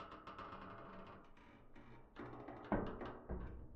lift 9 - creak Low
Some lift noises I gathered whilst doing foley for a project